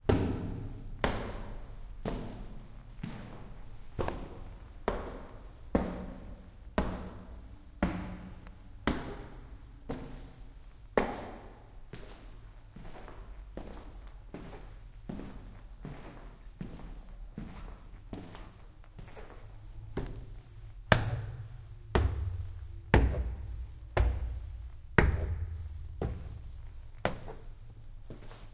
120807 Footsteps up stone stairs, slow, boots, echo, Aarhus
Slow, echoey footsteps on stone steps. Sony M10. 2012.
boots, footsteps, stairs, walking